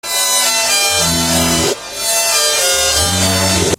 designed to be used as loops to create witch-house type music.